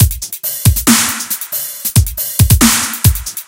Dubstep Beat
techno, bass, hihats, dub, beat, bassdrum, drums, snare, combo, hihat, dubstep, wob, hi, electric, kick, hat, beats, snares, clap